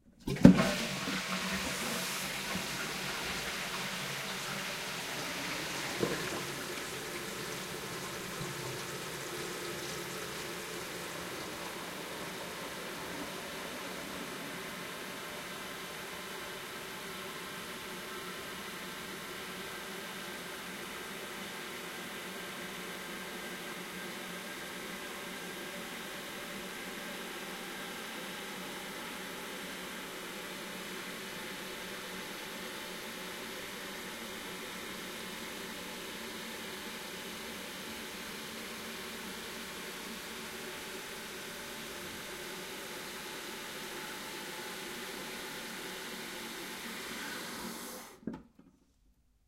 Toilet Flush Long
Flushing my toilet :D
Long, Toilet